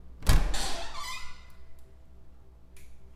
porta abrindo 3
Door opening inside an empty building.
lock, open, wood, reverb, door